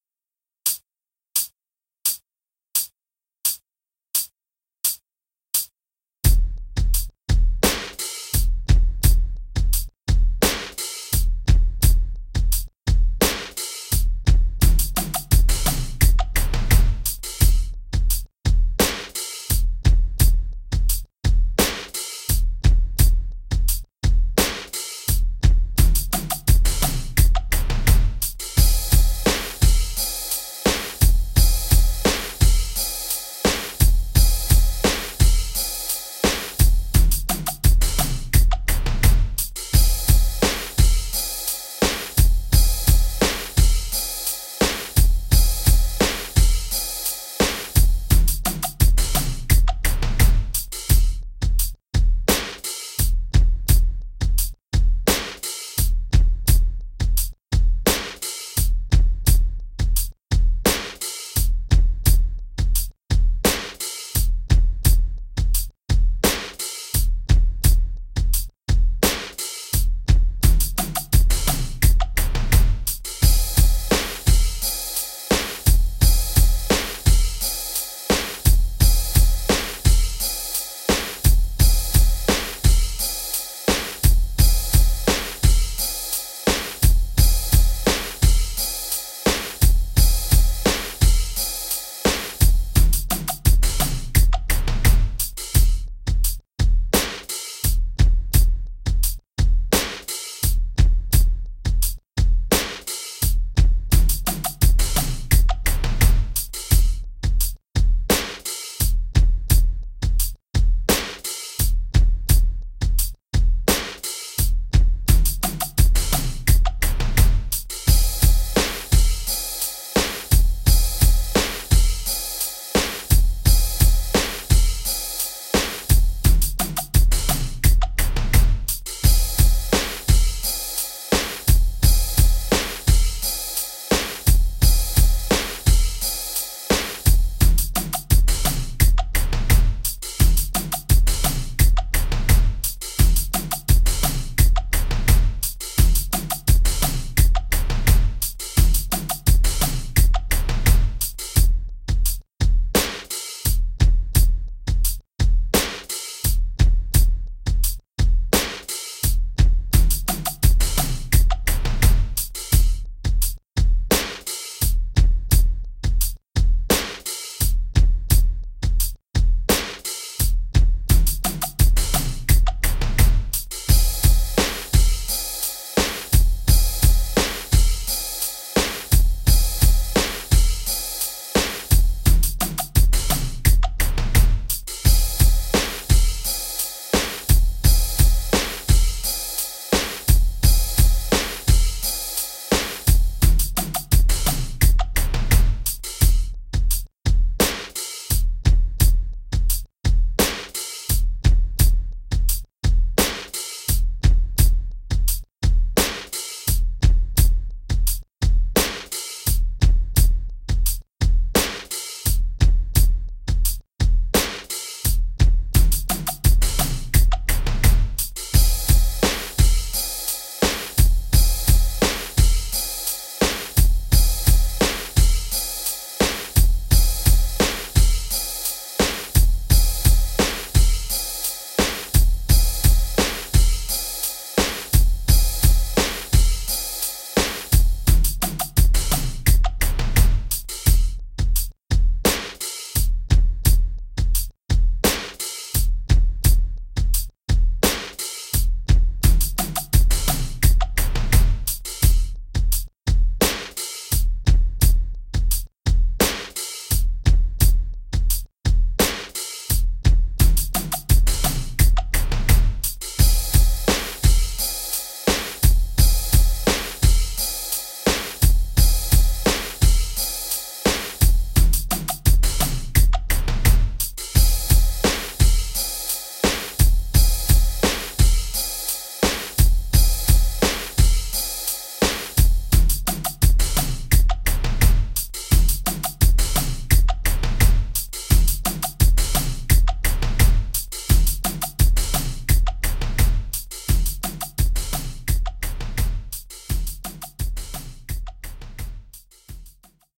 I created these perfect Drum/Beat loops using my Yamaha PSR463 Synthesizer, my ZoomR8 portable Studio, Hydrogen, Electric Drums and Audacity.